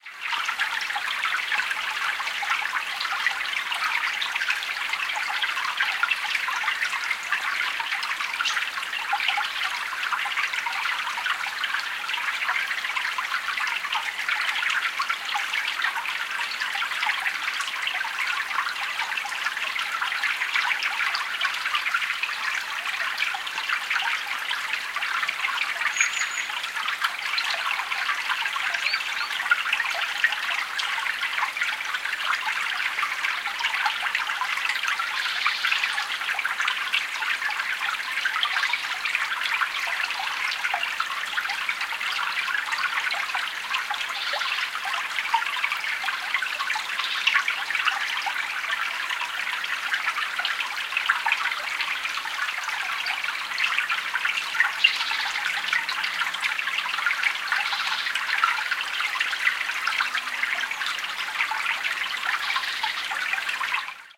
A stereo field-recording of a wooded mountain stream. Rode NT-4 > FEL battery pre-amp > Zoom H2 line in.
ambiance, ambience, birds, brook, field-recording, gurgle, mountain, mountain-stream, nant, nant-y-mynydd, stereo, stream, tarn, water, xy